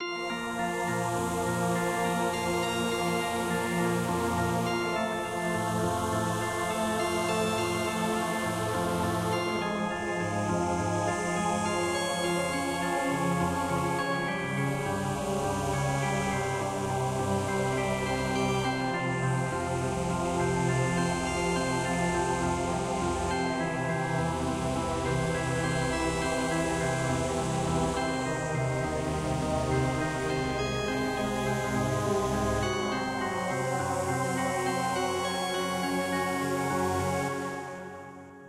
choir and organ
A high quality clip of a digital choir with an organ. Made just for the fun of it.
organ, choir